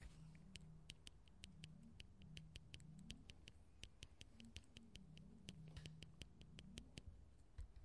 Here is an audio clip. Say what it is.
Sounds from objects that are beloved to the participant pupils at the Doctor Puigvert school, in Barcelona. The source of the sounds has to be guessed.
2014, doctor-puigvert, february, mysounds, sonsdebarcelona